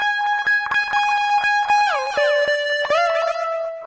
paul t high lead1
high guitar lead
guitar, lead